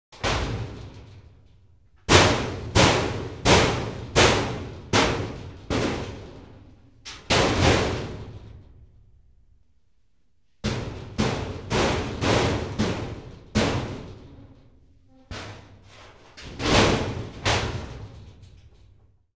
banging metal cabinet
Banging the door of a storage cabinet for clothes
noise, office